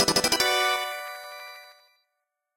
This sound is most likely to play when you are awarded an extra fighter when playing Galaga Arrangement Resurrection. Created using OpenMPT 1.25.04.00
galaga, games